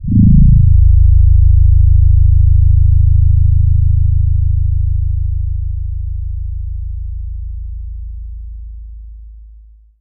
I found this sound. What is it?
LF bass 5
Playing around with FM synthesis. Low frequency tones. Rendered on SoundForge 7
low-frequency, bass